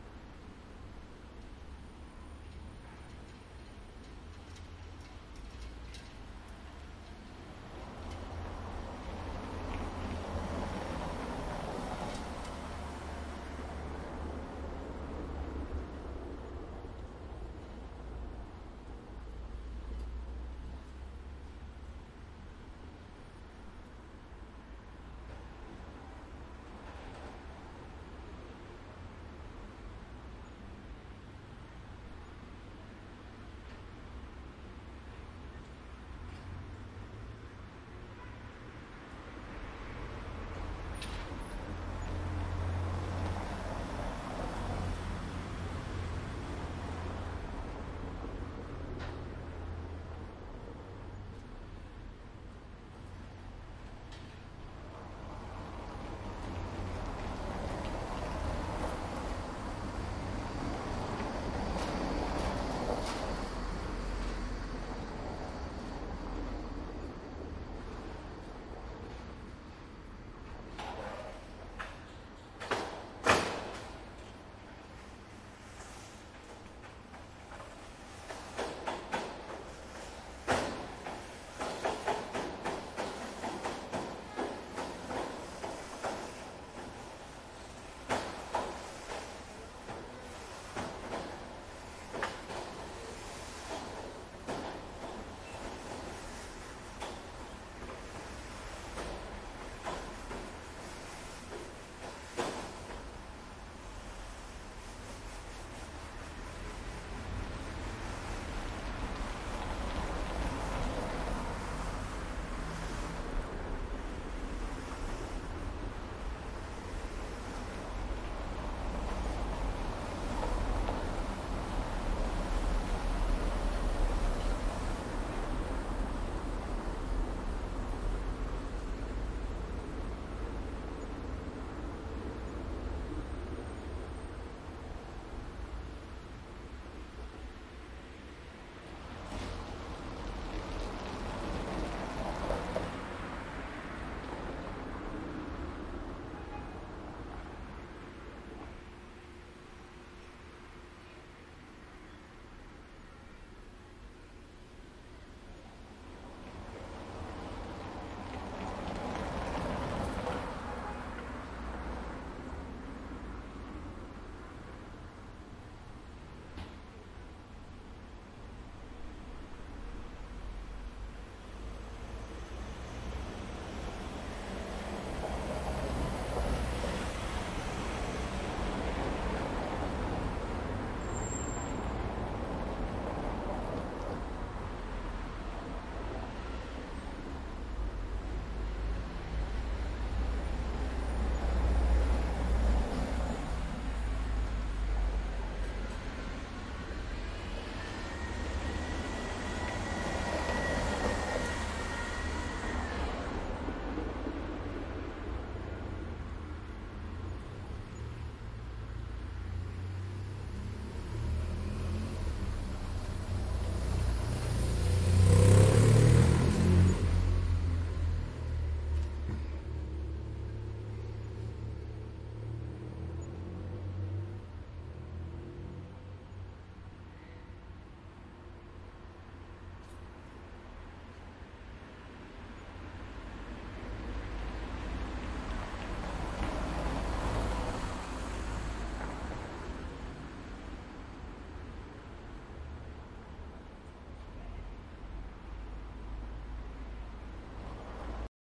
Cobblestone street - cars and motocycle passing by - quiet day
"Quiet noon, cars passing by" ambience. Recorded from a second floor balcony using Zoom H4N built in stereo microphones.
ambience, Buenos-Aires, car, cars, city, cobblestone, far-away, field-recording, metallic-roller-blind, quiet-traffic, street, traffic